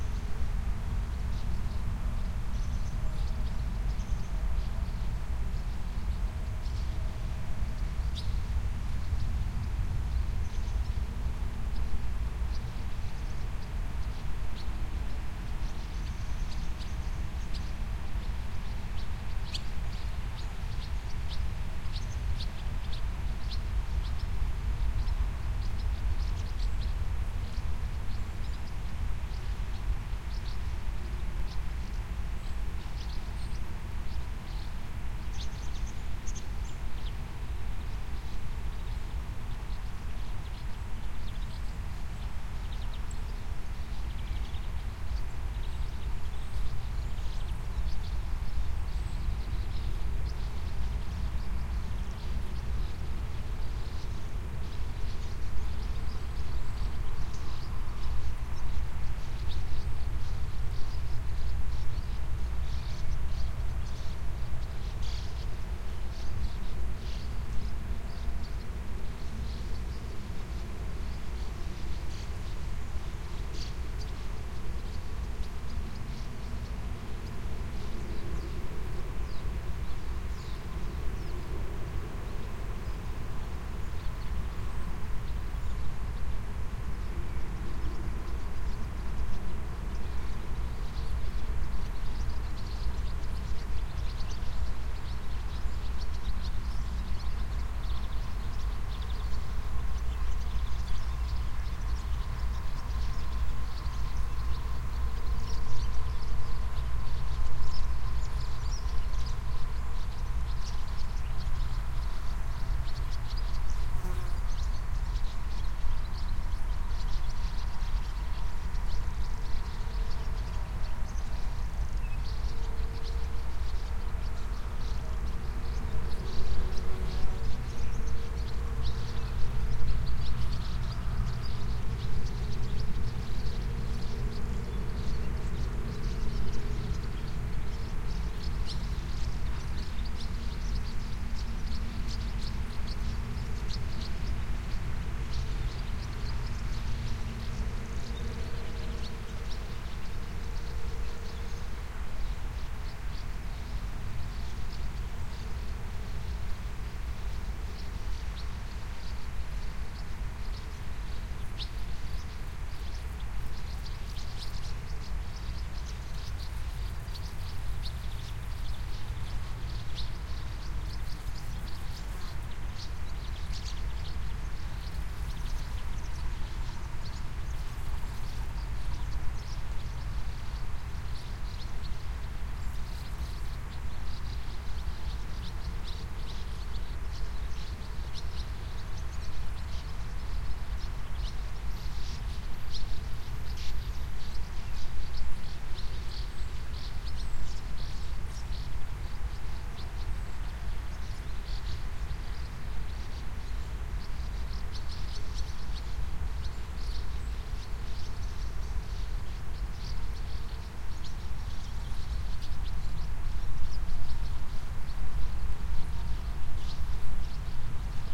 Meadow in the south of Hanover/Germany. Distant Swallows and other birds. Primo EM172 into Sony PCM-D50.